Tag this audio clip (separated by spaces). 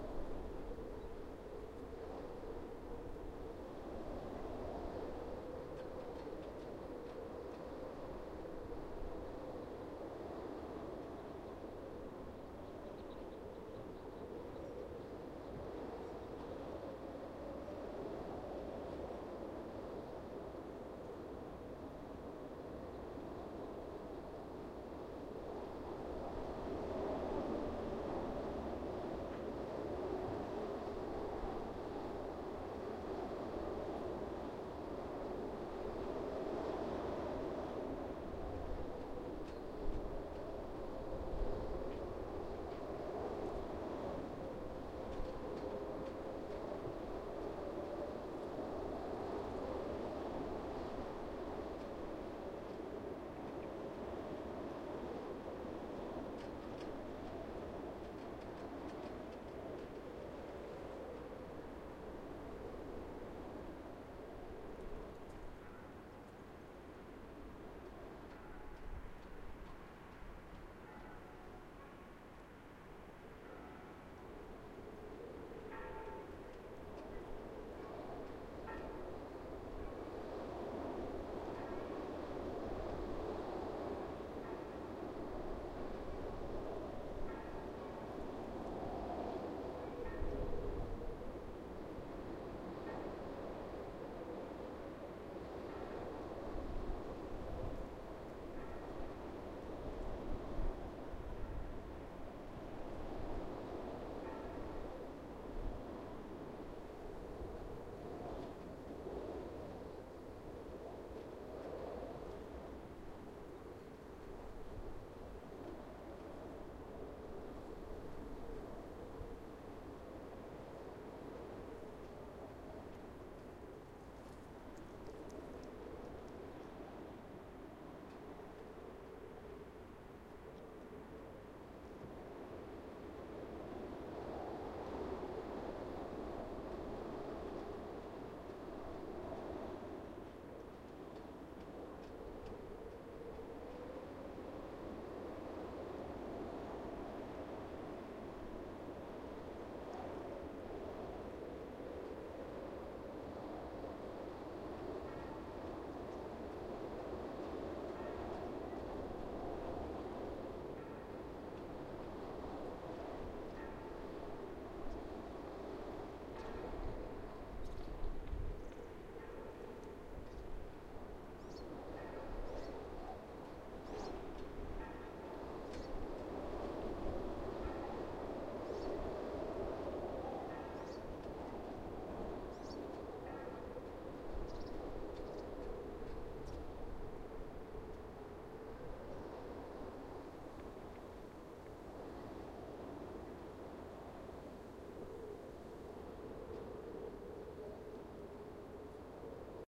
birds blowing hill leaves radio-antena tree wind